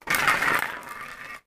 spray can 08
mono recording of a spray can tossed and rolled. created for use in a game project. no additional processing was performed.
can, drop, fx, game, roll, spray, toss